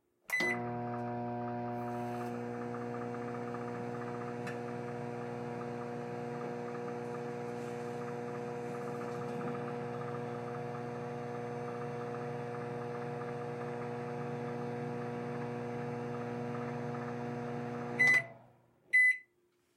microwave;brrr
buttons, everyday, microwave, power